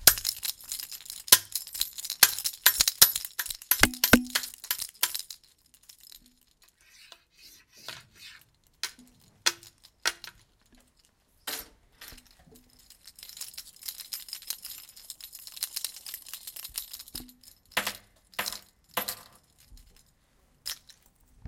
Here are the sounds recorded from various objects.